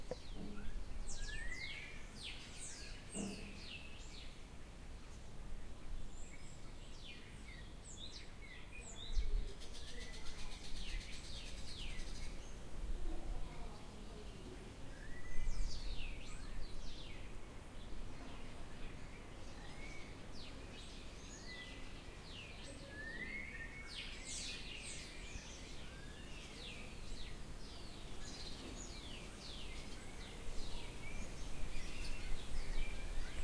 volubilis nature birds
Birds recorded in the ancient ruins of the town of Volubilis, Morocco